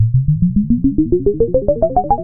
used intensively in the final chapter of "Ambienta" soundtrack! i'm proud enough of this sound that I have tried to design and re-produce for along time till obtaining a satisfactory result (i realized the square waveform was the key!!). it's a classic moog sweep you can ear in many many oldschool and contemporary tunes (LCD Soundsystem "Disco Infiltrator"; Luke Vibert "Homewerks"; Beck "Medley of Vultures" ..just to make a few examples). sound was bounced as a long sweep, then sliced as 6 separate perfectly loopable files to fit better mixes of different tempos: first 2 files is pitching up, pt 2 and 3 are pitching down, last 2 files are 2 tails pitching down. Hope you will enjoy and make some good use (if you do, please let me ear ;)